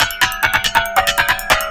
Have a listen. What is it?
Sounds somewhat like tubular bells.
gamelan
bells, glass